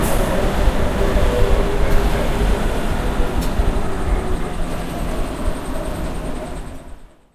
engine3 down
A machine decelerating. A whine stutters and drops revealing the engine noise underneath.
accelerating, decelerating, engine, hum, machine, slowing, slowing-down, train